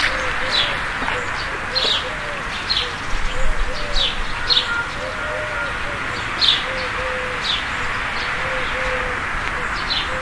This sound was recorded with an Olympus WS-550M and it's the sound of a bird near the graveyard.